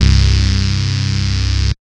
SYNTH BASS 0202
SYNTH SAW BASS
bass, synth, saw